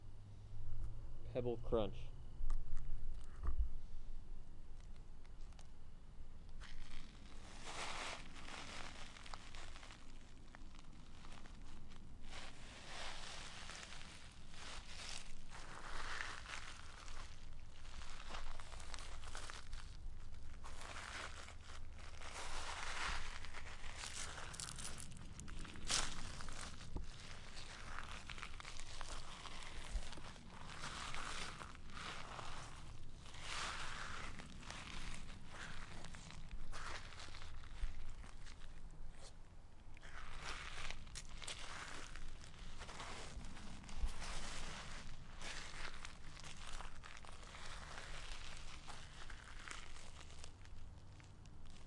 pebble crunch
nature, mono, field-recording